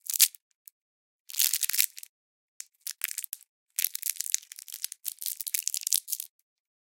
candy wrapper unwrap B
unwrapping a hard candy from its plastic wrapper.
unwrap, candy